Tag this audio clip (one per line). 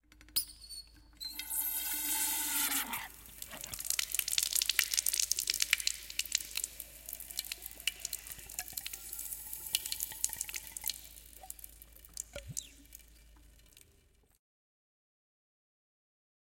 CZ
Czech
Pansk
Panska